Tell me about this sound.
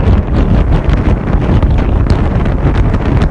wind windy storm
windy, wind